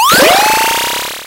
SFX Powerup 26
8-bit retro chipsound chip 8bit chiptune powerup video-game
8bit, retro, video-game, 8-bit, chiptune, powerup